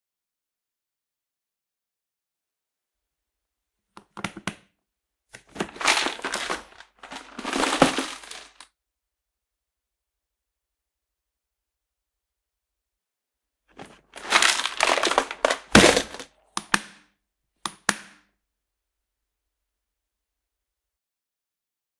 I4 plastic toolbox
opening and closing a toolbox into a warehouse